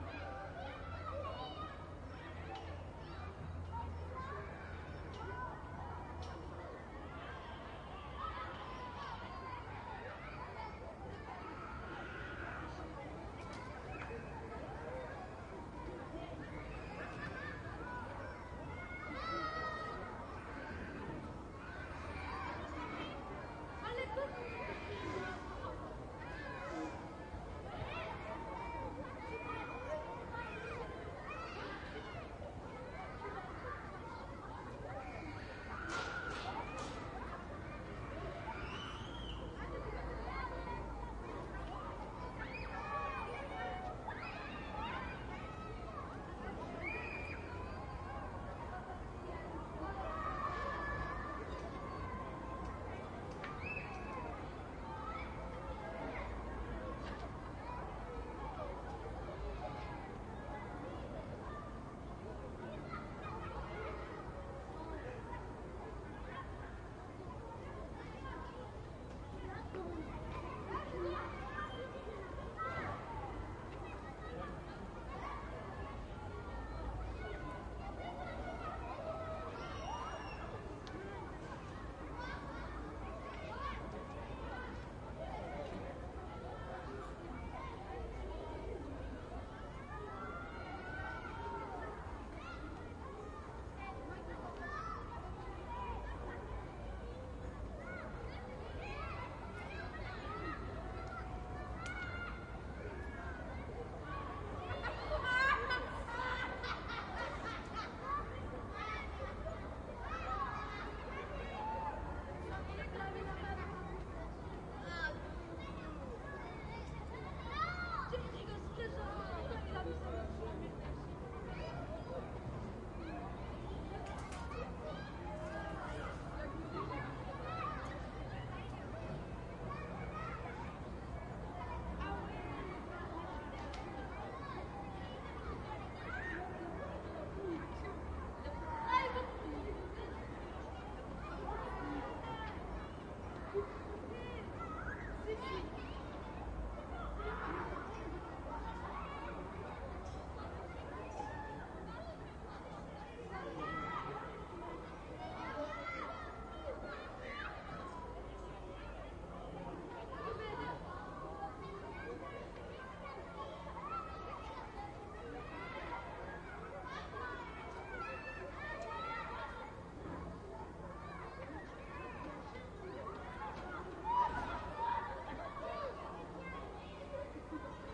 Paris HLM Aire de jeux
LCR field-recording of a parisian suburb. Day atmoshphere.